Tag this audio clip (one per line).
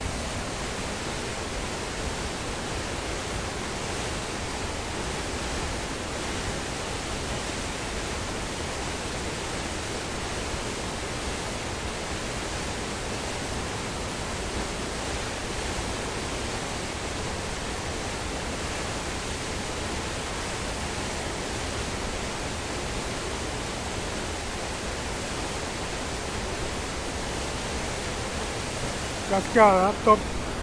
Los-Alerces
Patagonia
Waterfall